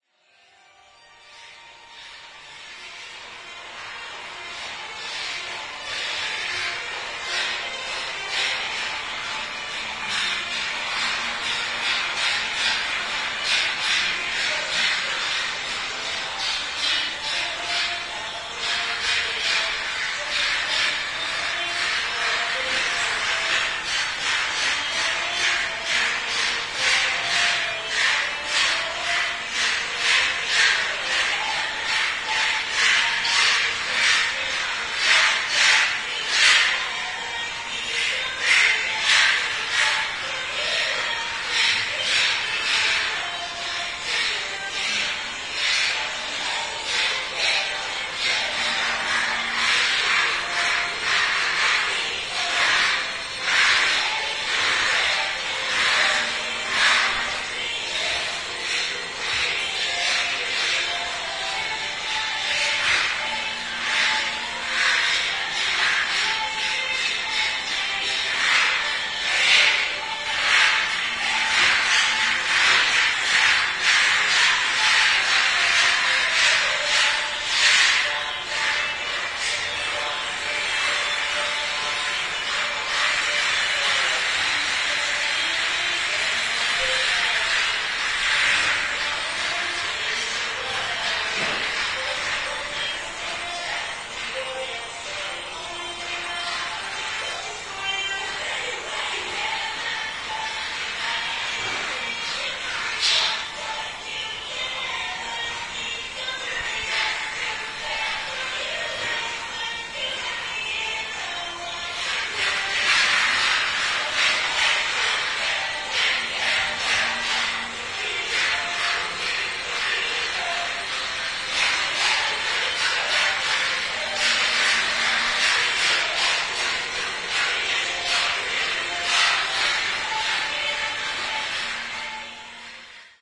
29.09.09: about 13.00, on the stairwell of the tenement where I live a group of workers is listening the radio during their work